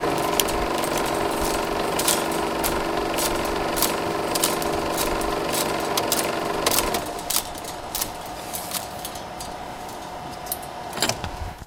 05 - Rewind and turning off projector
Rewind and turning off 16mm projector - Brand: Eiki
Rebobinado y apagado de proyector de 16mm - Marca: Eiki
projector, field-recording, 16mm